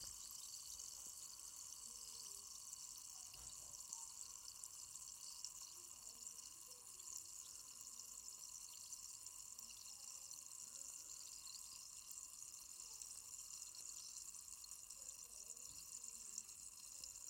Fizzy Water 02
Sound of fizzy water
Water, Bubbles, Fizz